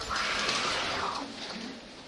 ER altereddoor
Edited out the handling noise and stuff from a snippet of the automatic star trek doors at the hospital emergency room recorded with DS-40.
ambience, emergency, hospital